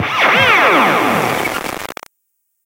Alien Weapon 014 superamplified
See pack description for additional information on how this sound was created.
This was a very low volume tail in the original recording. It has been massively amplified and compressed in Audacity to bring out the detail at the tail end.
The end of the tail was such low volume in the recording that, once amplified, looks bit-crushed. No actual bit-crushing effect was used.